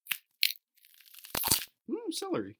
I Just Broke Some Old Brown Celery And It Left A Nice Refreshing Sent On My Hands. Anyways It Makes A Bone Crunch Sound I Guess
celery, crunch, crack, break, bone